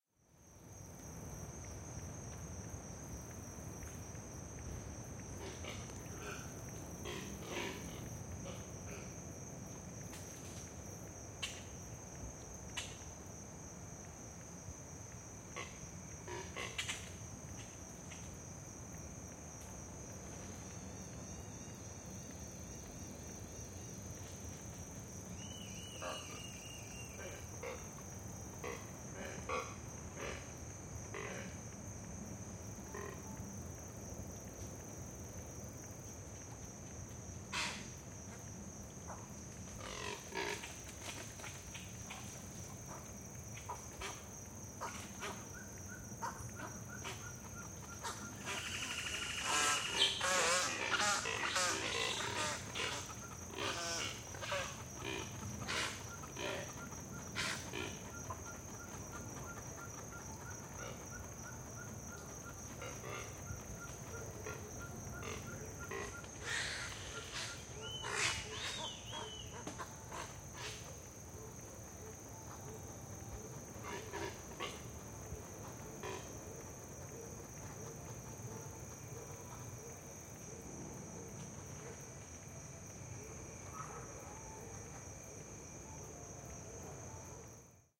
Miramar Noche
Laguna de Miramar en Nayarit, Mexico, noche.
Decoded MS Stereo Sennheiser Mkh30&50
nayarit, field-recording, laguna, birds, lagune, oiseaux, mexico, night, pajaros, nuit, miramar, noche